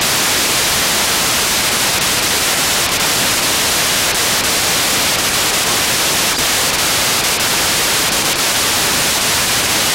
10 second clip of Automatic Identification System (AIS) packets recorded from the discriminator tap of a scanner.